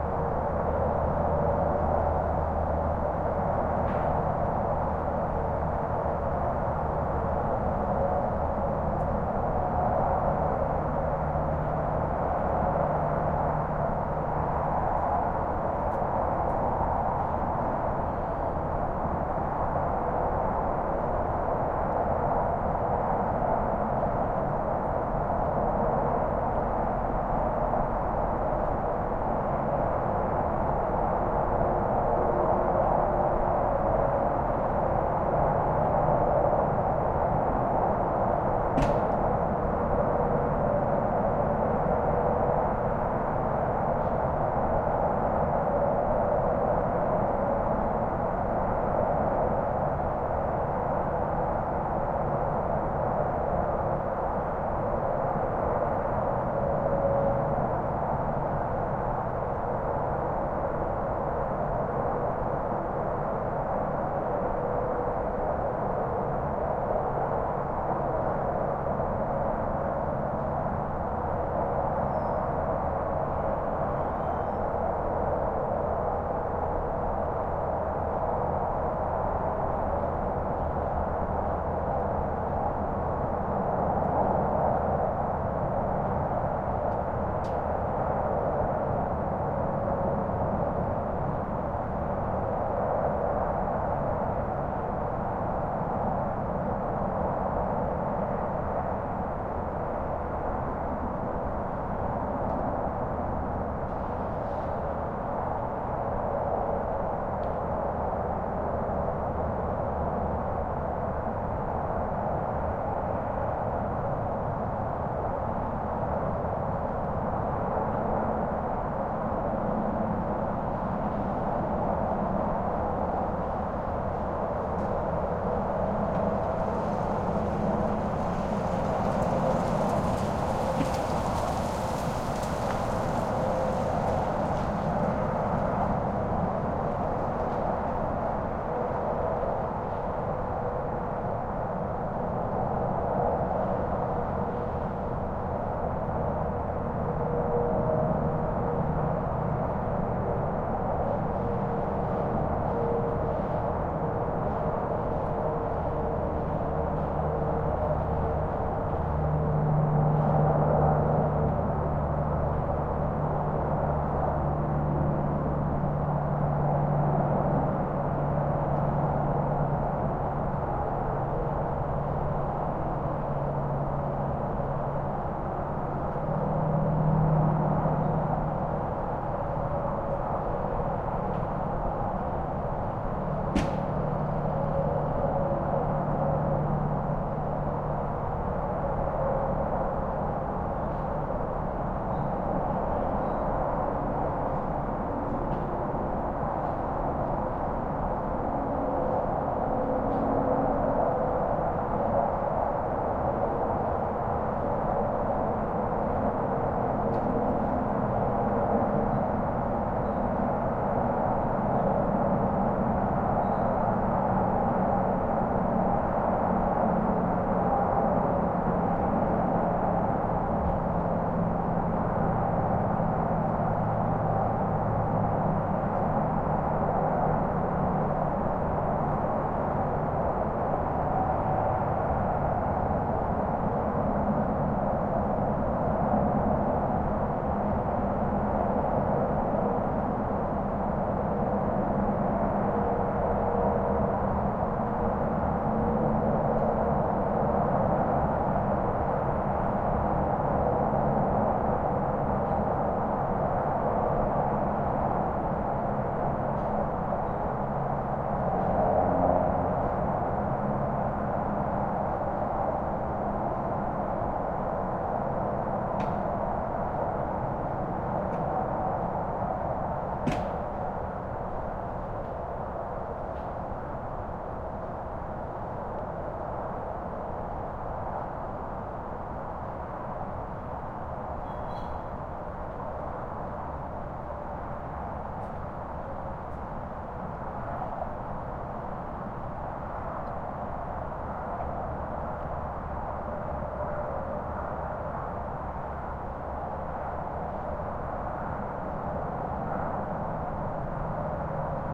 skyline highway traffic distant far or nearby haze from campground with distant truck engine breaks and indistinct campground activity
skyline, highway, traffic, haze, far, campground, distant